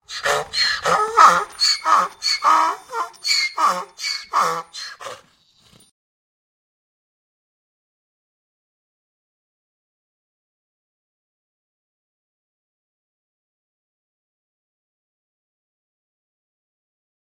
donkey crying on a farm
animal, donkey, farm